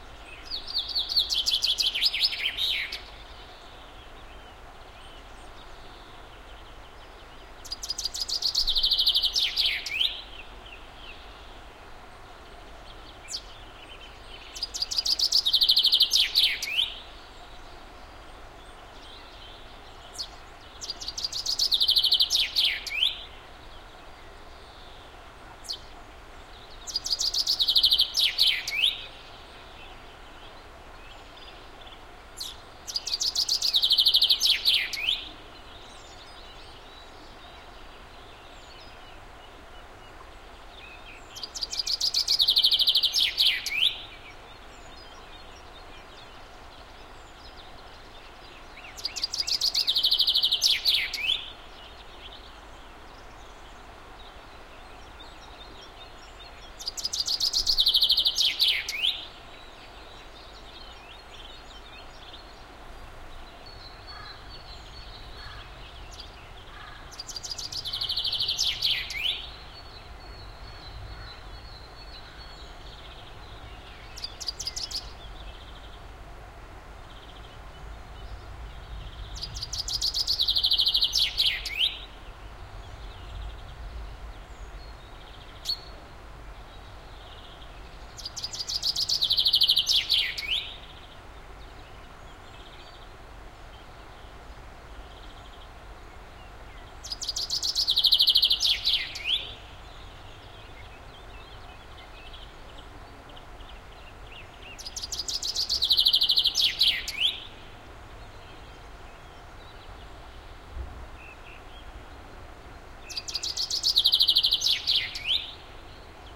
can´t wait for springtime
A short clip of things to come...and it isn´t even winter yet. Sony PCM-D50 and Shure WL183 microphones.
harzmountains, spring, field-recording, harz, bird, birdsong